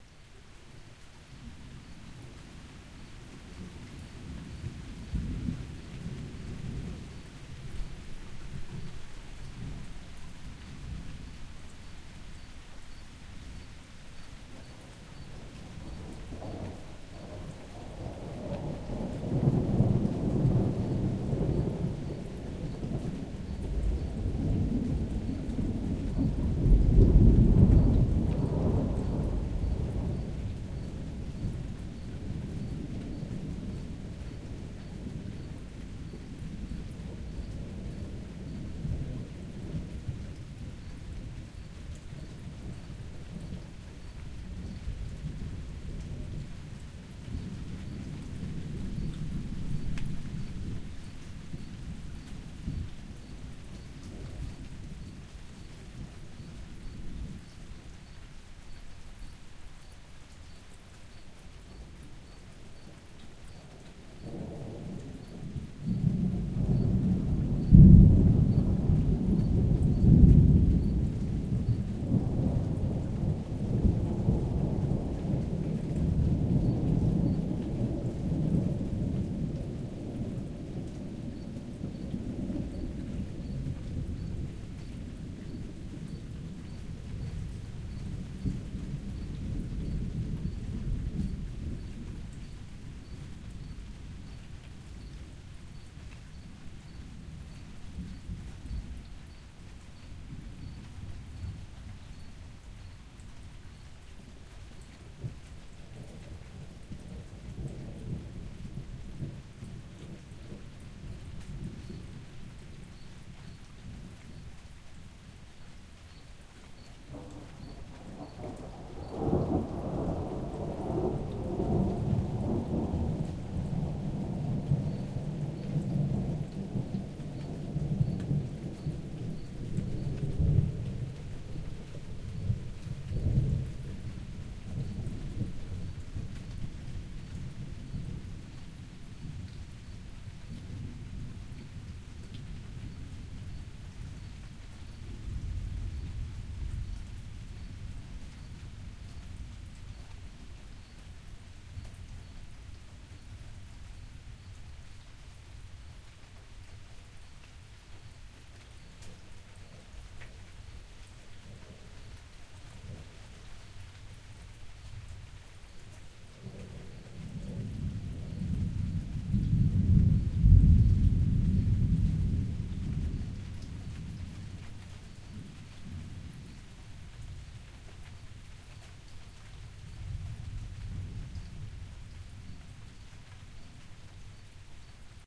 AMBIENT LOOP - Perfect Spectacular Hi-Quality Rain + Thunderstorm 001
A longer seamless loop of thunder and lightning that crackles, booms and rumbles. Very heavy bass and extremely high quality audio. Recorded with a H4 Handy Recorder.